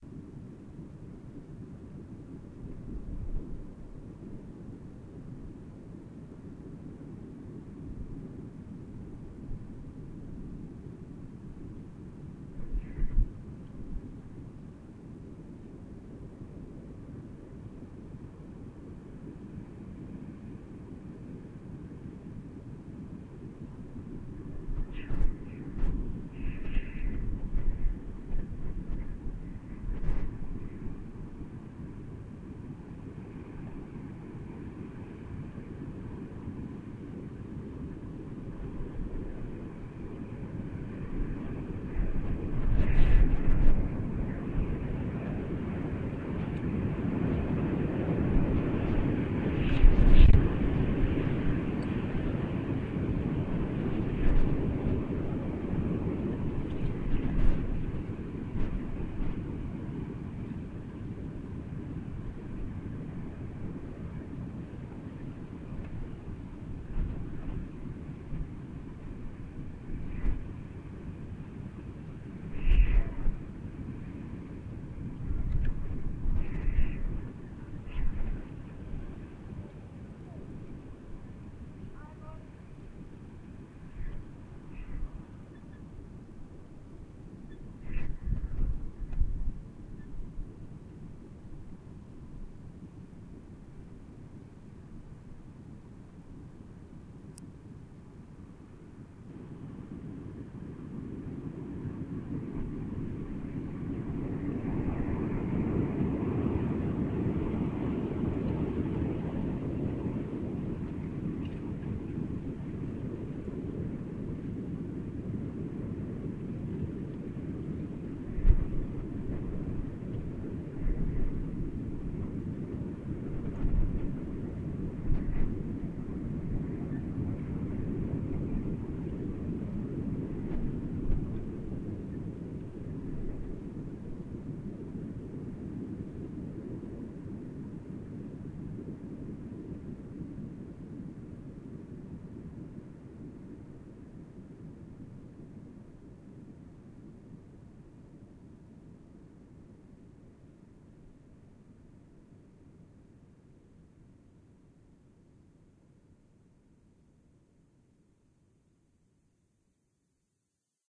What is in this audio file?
Wind howling on a windy eastern cost night. Yes, it contains lots of classic whistles and howls!